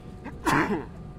The man sneezes in a plane.
Date: 2016.03.07
Recorder: Tascam DR-40